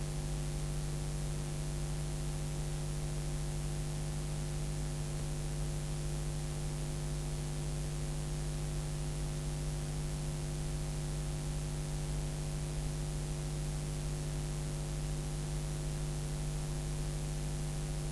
Tandberg ¼" tape noise
50 year old tape stock. Tandberg low noise ¼" tape played on an equally old Revox machine.
Tandberg
fx, noise, sample